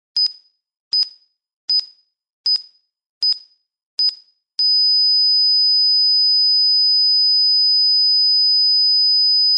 heart monitor beep
This sound is a beep like heart monitor, i created with an audio editor, i used cine wave 5000 hz with intervals of 500 ms betuin the beeps i also aplied a soft reverb.
Unfortunately this people dies on the end. sad.
beep, die, flate-line, heart, monitor